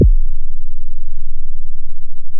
Software generated base drum.
base-drum, bd, drum, drums, synth, synth-sample, sythn-drum